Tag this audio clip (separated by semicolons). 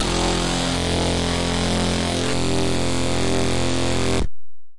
bit; crushed; digital; dirty; synth